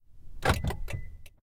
unlock car with beep
unlocking car with a BEEP